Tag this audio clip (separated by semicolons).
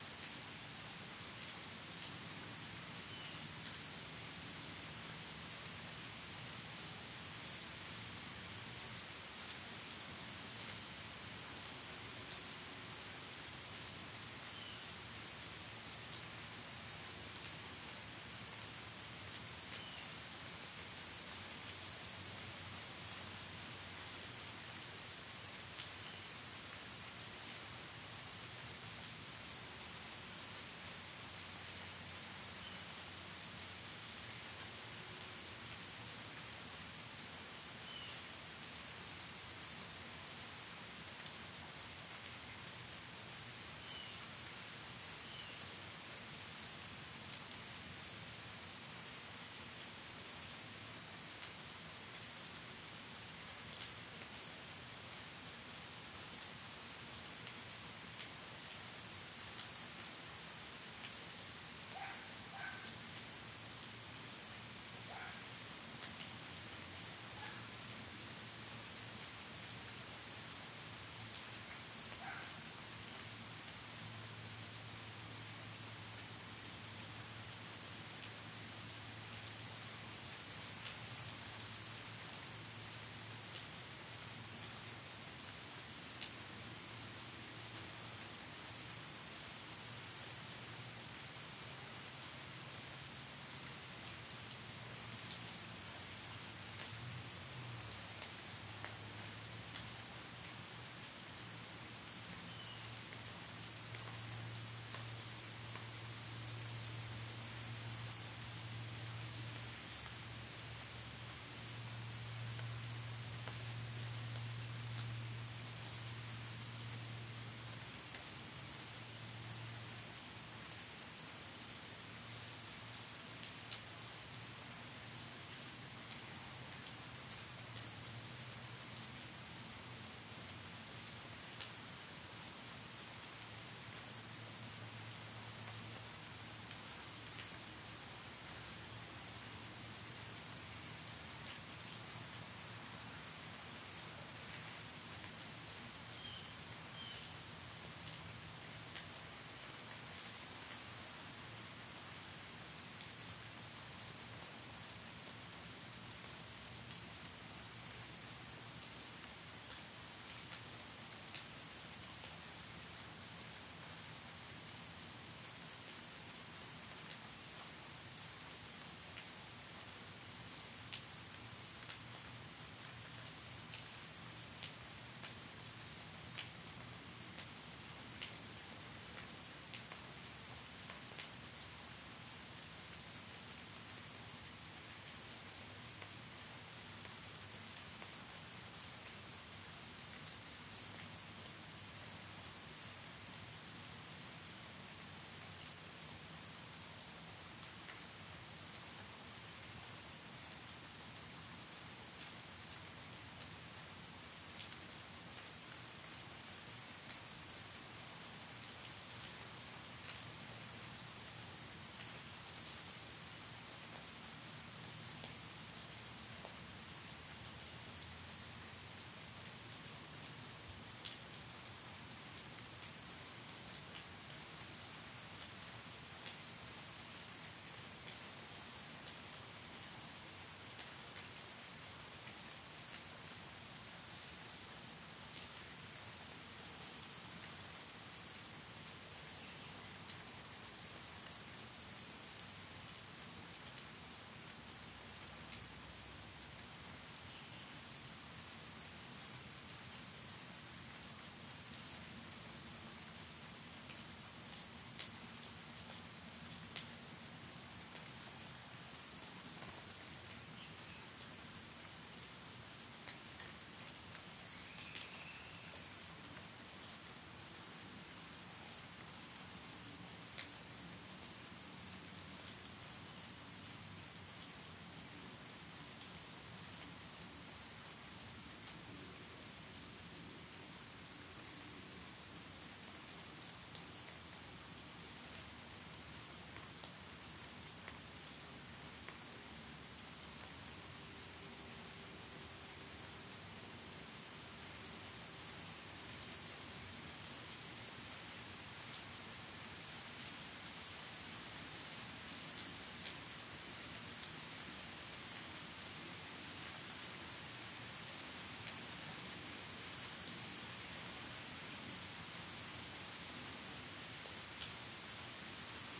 ambient; calm; field-recording; loop; porch; rain; relaxing; water